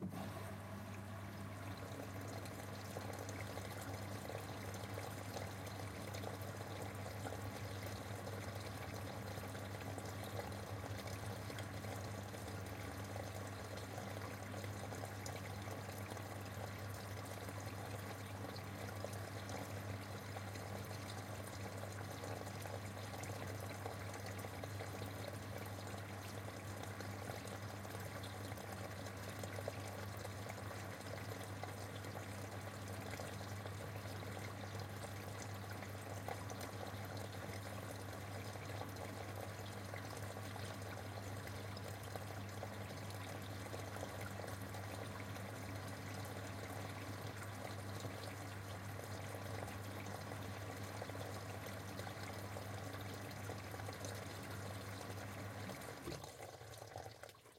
washing machine water filling